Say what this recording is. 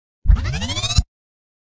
Transformer-inspired sound effect created with a contact microphone and a guitar pickup on various materials and machines.